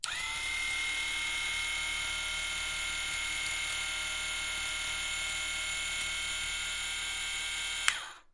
water pick squirting excess water
electric, accessory, pick, water